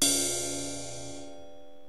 splash ting 1 cut
This was hit with my plastic tip on a 17" ride cut off by hand